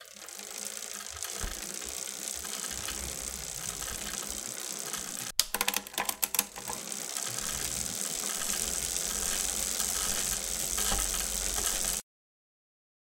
Gear Change OS
Mountain-Bike Gear-Change Shifter
Gear-Change, Mountain-Bike, Shifter